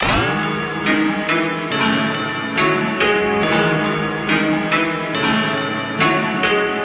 LCHZ 140 Mus 02
140 bpm music loop, suitable for uk drill & trap.
synth-loop, synth, music-loop